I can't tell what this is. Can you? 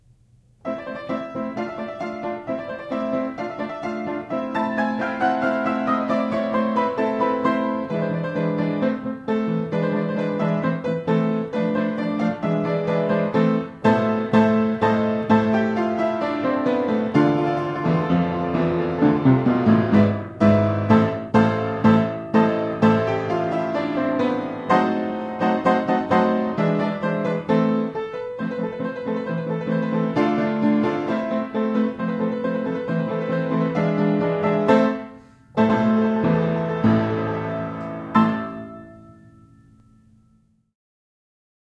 Silent Movie - Sam Fox - Hurry Music (take2)
Music from "Sam Fox Moving Picture Music Volume 1" by J.S. Zamecnik (1913). Played on a Hamilton Vertical - Recorded with a Sony ECM-99 stereo microphone to SonyMD (MZ-N707)
1920s, film, movie, piano, silent-film